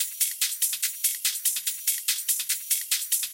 Hardbass
Hardstyle
Loops
140 BPM
Hardstyle, free, Hardbass, Loops